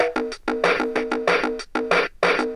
cheesy-toy-beat
A drum loop from my daughter's toy keyboard's built-in 8-bit drum machine. Cheesy sound, but I really found the beat interesting. I will probably use it in some of my music, but here it is in case anyone else wants to use it too.
toy, 8bit, beat, cheesy, loop, drum